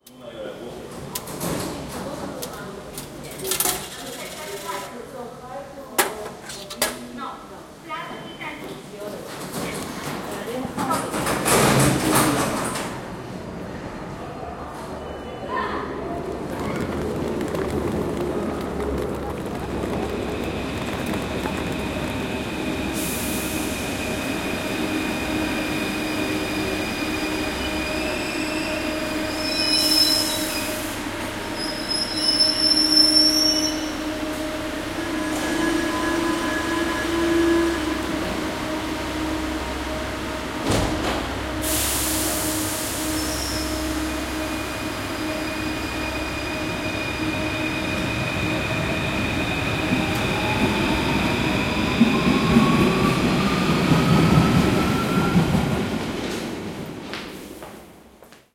Busy subway station in Paris, recorded with Zoom H2n